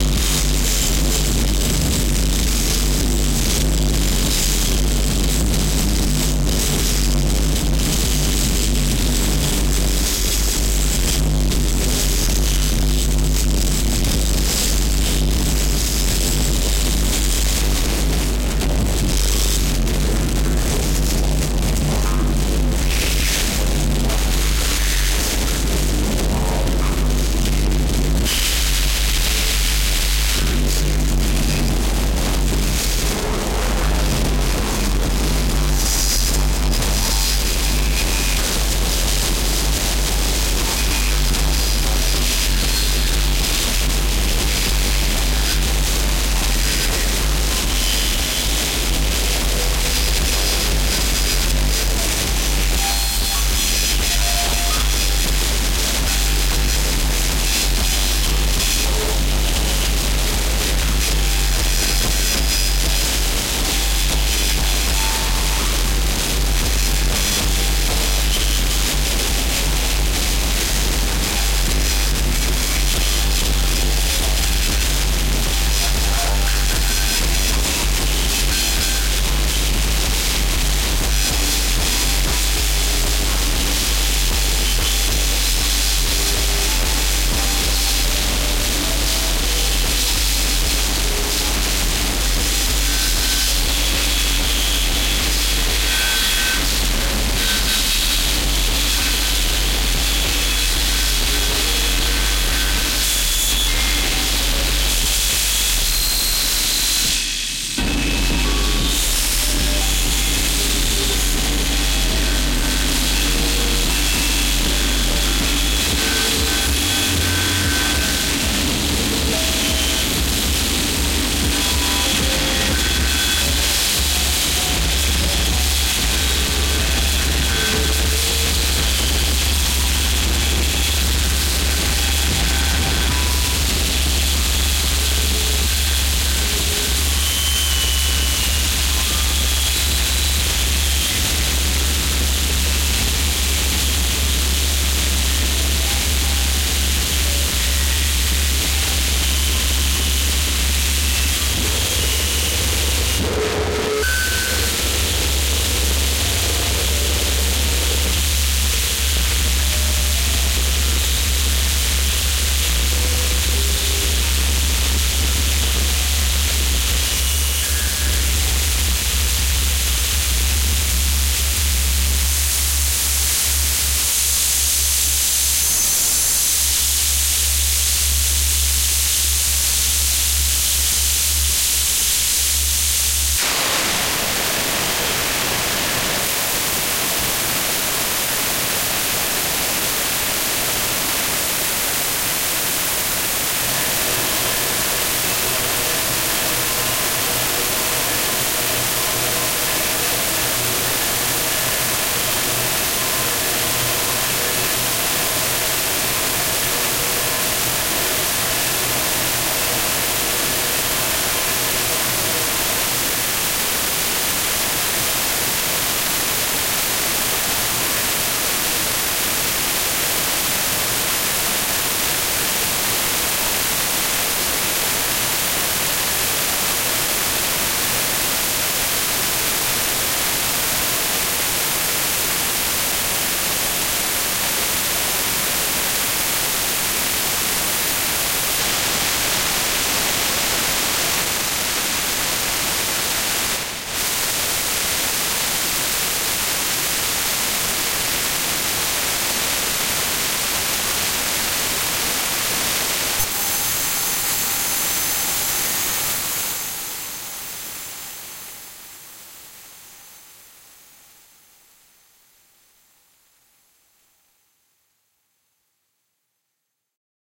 HARSH NOISE improv
⚠️⚠️⚠️ Warning: LOUD noise music! (I already turned it down 8dB, but still be aware, it's still loud)
This is an improvisation made with a lot of Ableton Effects.